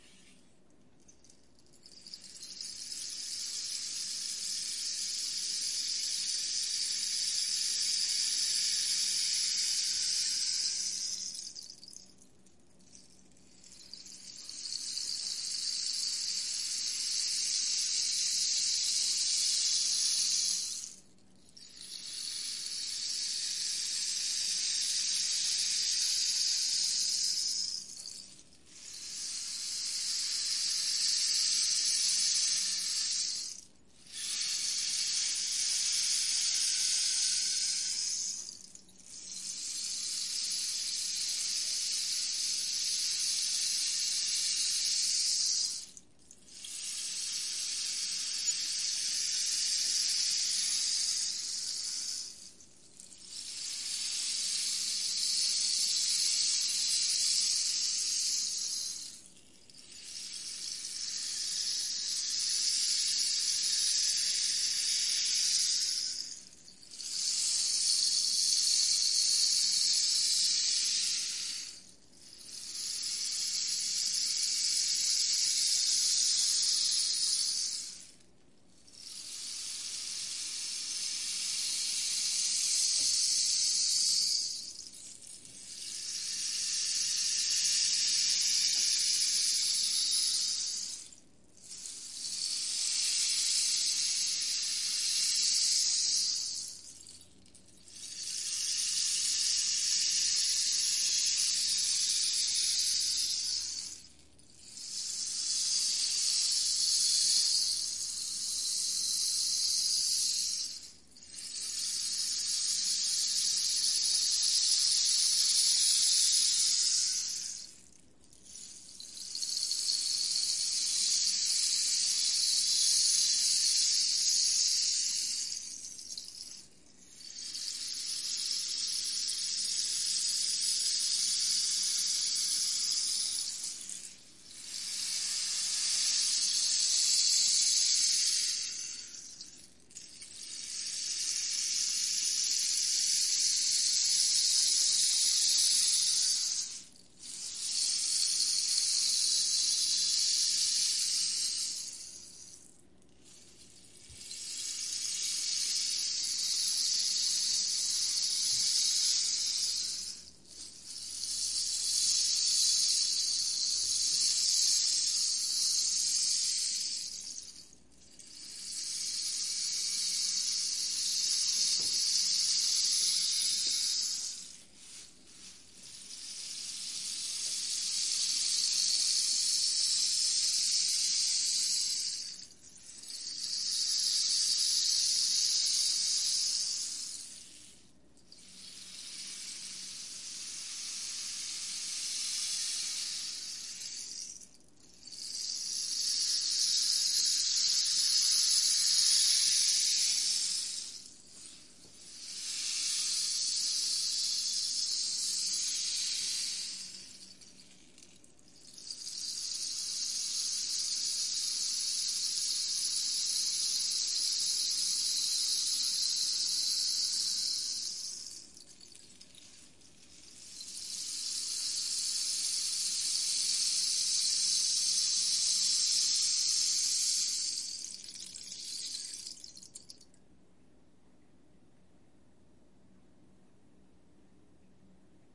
single track studio recording with 30” rainstick played to emulate the sound of a tranquil surf, recorded at 6-feet, centered, at Soundwell home studio, Boise Idaho USA, on Zoom H4 mic